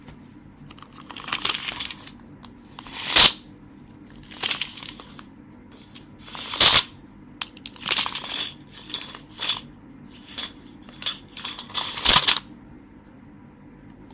Tape Measure Sounds
spring, Measure, flick, Tape, metalic, crash, smack